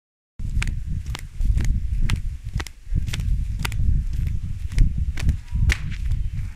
you can hear footsteps in flipflops
flipflops, footsteps, walking